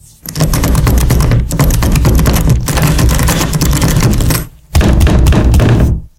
This is me forcefully trying to open a locked door
Recorded with a Sony HDR PJ260V then edited with Audacity